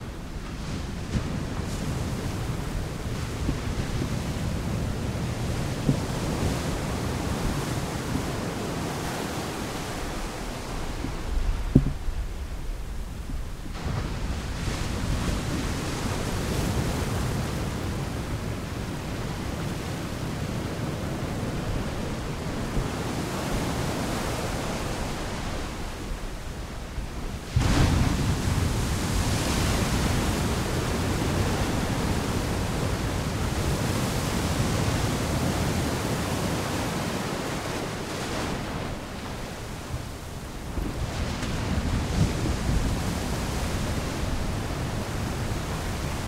Rocky Surf 3 161205 mono
Waves, close, crashing into rocks. Some handling noise. - recorded on 5 Dec 2016 at 1000 Steps Beach, CA, USA. - Recorded using this microphone & recorder: Sennheiser MKH 416 mic, Zoom H4 recorder; Light editing done in ProTools.
beach, waves, field-recording, ocean, water